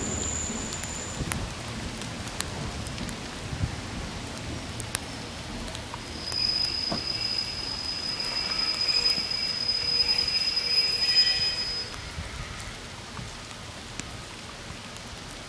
squeaky train brakes
A train heading for Leeds pulls in to Elsecar railway station in Barnsley, South Yorkshire, UK, in the rain. When it arrives there are some VERY squeaky brakes! Recorded with fourth generation iPod
annoying, brakes, field-recording, rail, railway, rain, squeaky, station, train